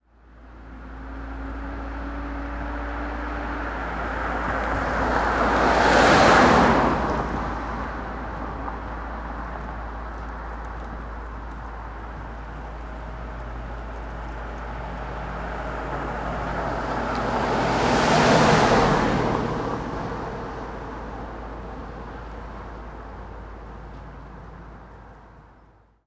Car by med Mazda and SUV DonFX
by, car, pass, passing